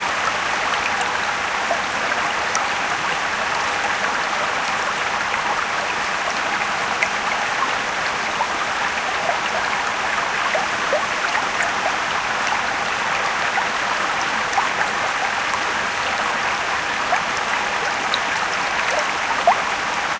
Small stream trickling with small waterfall close by